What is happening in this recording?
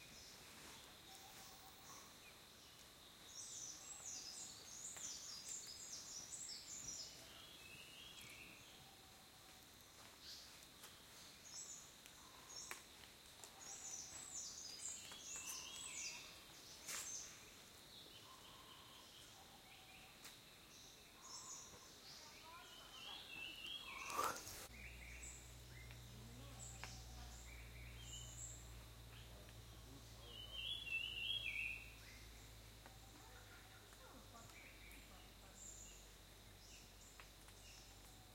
fondo audio località foresta
Indian forest, birds in the very morning. Recorded with Sony A7SII
nature, field, forest, Kerala, birds, spring, field-recording, indian